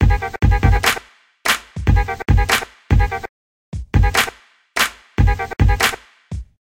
experimented on dubstep/grime drum loops
140bpm, grime, dubstep, loop, dub, drum, 140